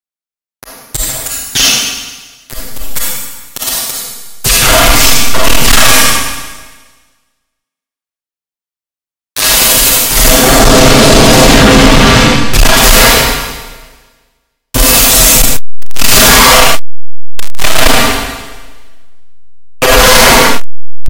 Hi-end brutal noise glitch. Take care of your ears and speakers.
rage, noise-dub, brutal, crush, anger, glitch, noise, dub